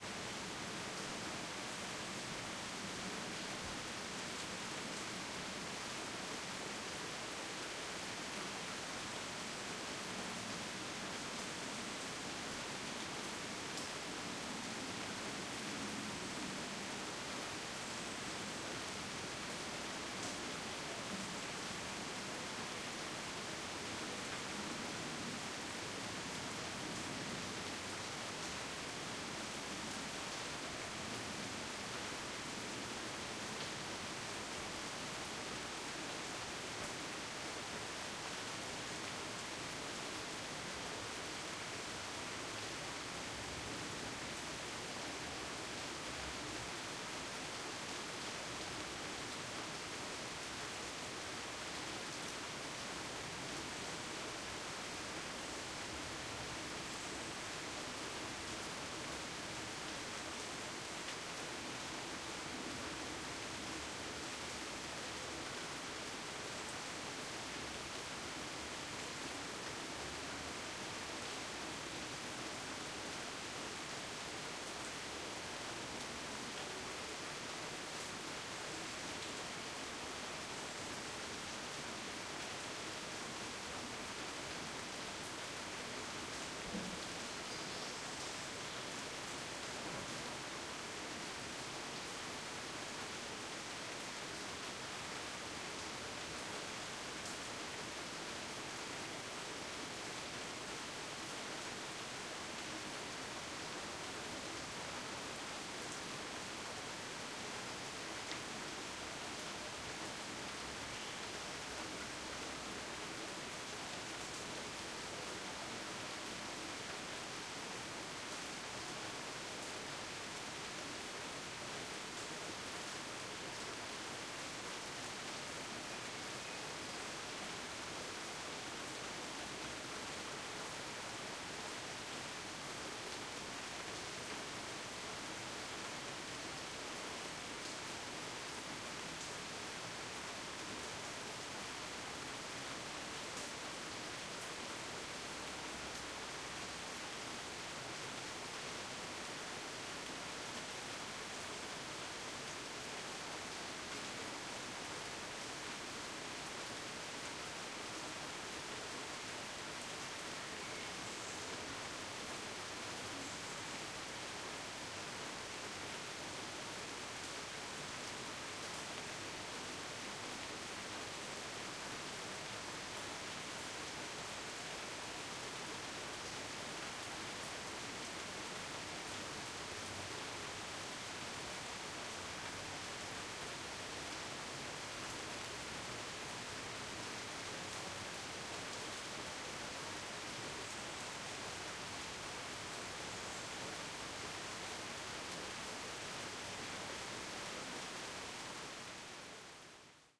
Rain in the city - Medium rain - distant city hum
Stereo x/y matched pair, omni directional recording in Amsterdam. medium rain (not heavy, no drizzle) bit of distant cityhum, distant drops and splashes. enjoy!